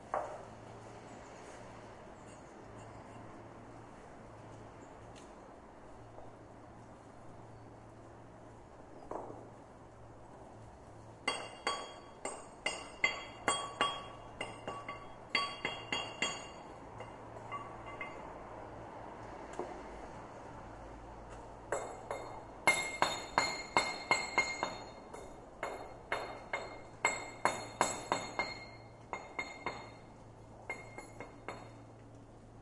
doing them cobblestones
Sounds a bit like Maxwell´s Silver Hammer, but is the sound of a bloke putting back some cobblestones after some roadworks. Olympus LS-10.